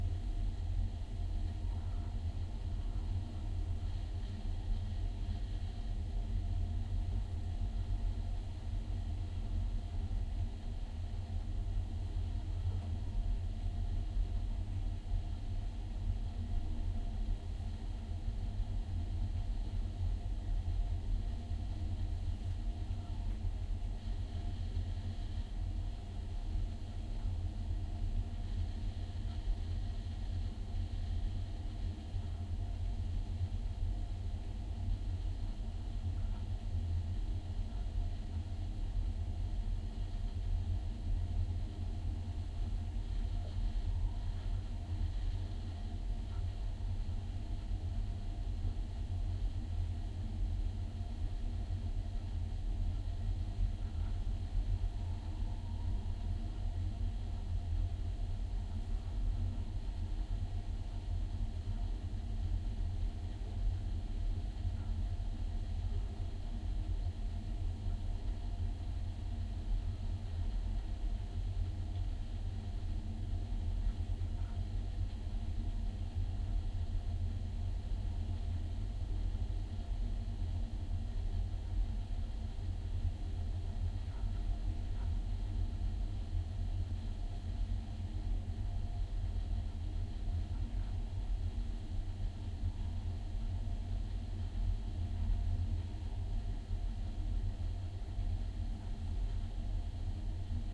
Small cellar room-tone with electric buzz & mechanical ventilation.
EM172 > ULN-2
atmosphere, background, cellar, environment, room-tone, roomtone